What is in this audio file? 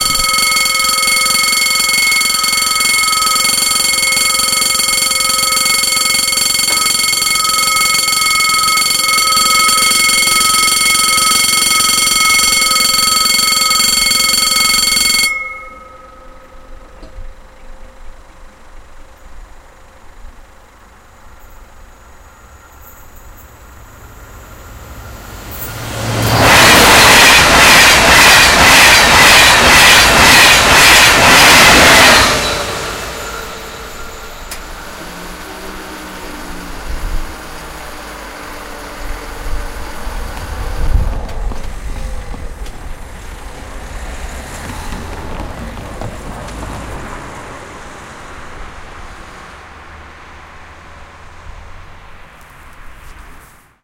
Train passing level crossing
Passage d'un TGV Inoui avec bruit des barrières automatiques. Amusez-vous à compter avec vos oreilles le nombre de wagons (ou voitures) de ce TGV.
Les commentaires sont aussi les bienvenus :-)
Passage of a TGV Inoui (high speed french train) with the noise of automatic barriers. Have fun counting with your ears the number of wagons on this TGV.
Want to support this sound project?
Many many thanks